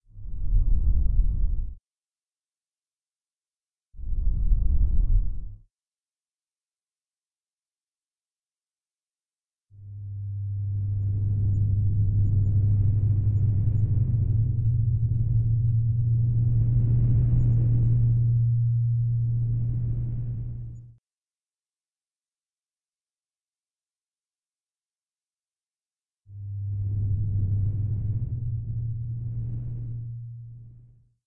Bass Pan [Multi]
Some bass-y panning for trailers and brain-aching atmospherics.